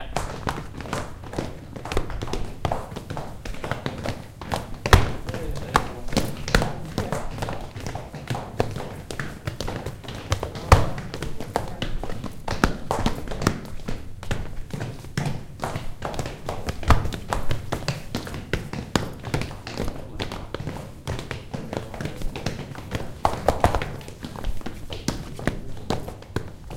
Steps - walk
6 people walking in circles around a the microphone. Recording done at floor level.
Sounds produced and recorded by students of MM. Concepcionistes in the context of ESCOLAB activity at Universitat Pompeu Fabra (Barcelona).
Recorded with a Zoom H4 recorder.